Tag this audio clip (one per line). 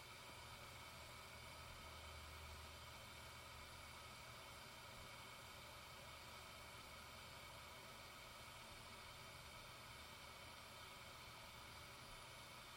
ambience
burner
combustion
fire
flame
gas